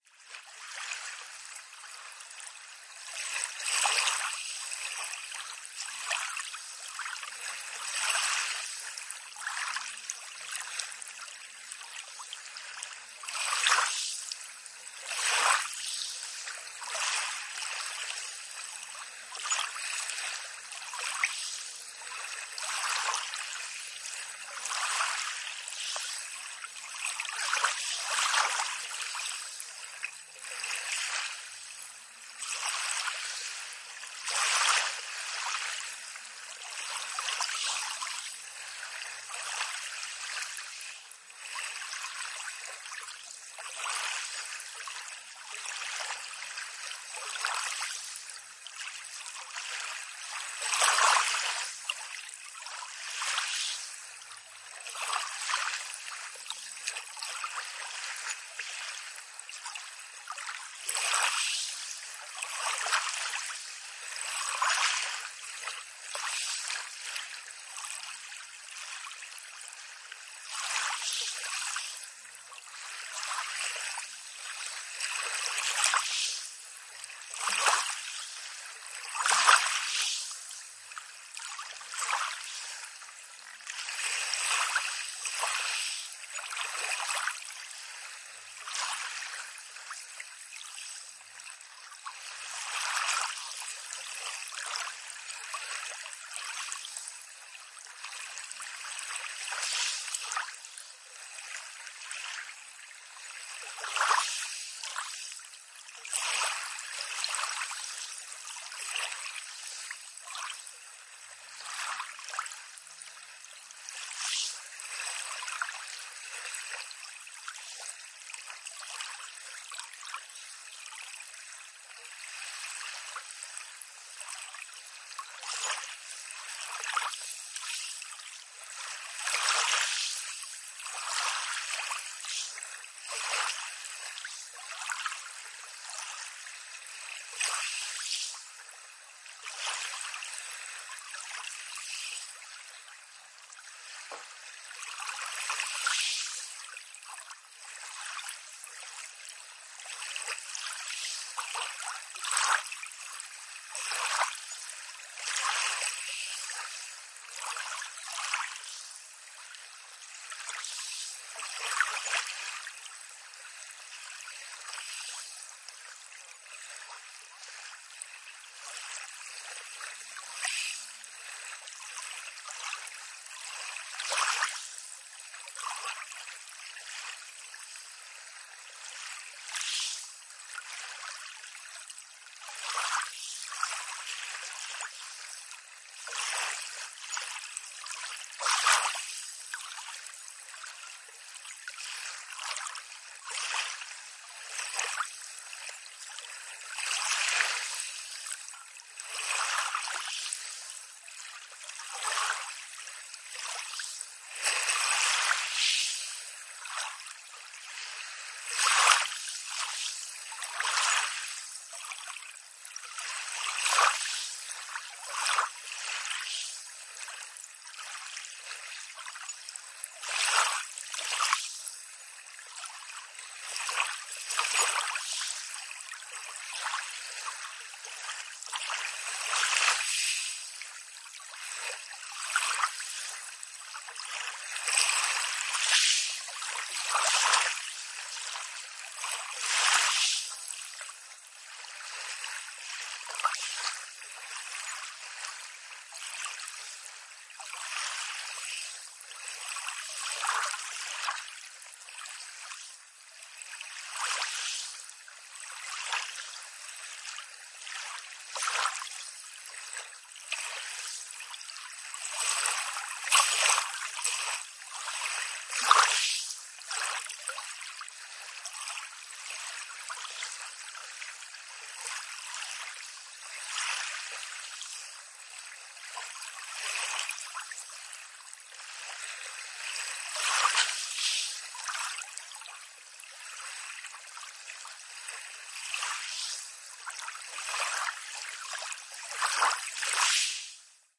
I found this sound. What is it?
seashore egypt - calm sea
egypt, seashore, beach